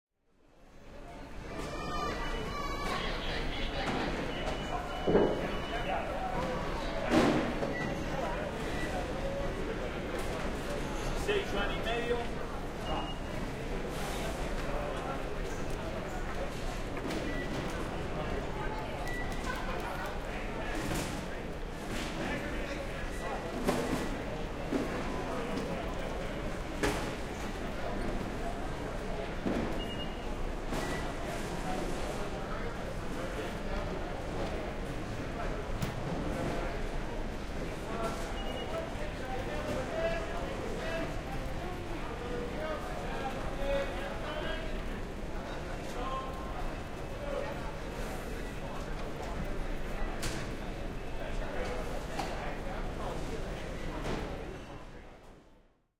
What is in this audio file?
Passenger security screening at San Francisco International Airport (SFO). This 56 second recording was captured behind the Terminal 3 TSA checkpoint on September 29, 2006, with pair of Sennheiser MKH-800 microphones in a mid-side arrangement (mixed here to conventional left-right stereo) and a Sound Devices 744T digital recorder.
airport, checkpoint, field-recording, homeland-security, security, sfo, tsa